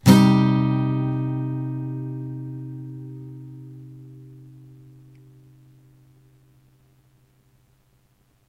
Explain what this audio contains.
Yamaha acoustic guitar strummed with metal pick into B1.